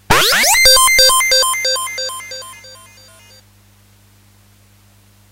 comet high C portamento from low F
A portamento slide from the lowest key (F) to the highest (C) on the comet program from Yamaha pss170
comet, portamento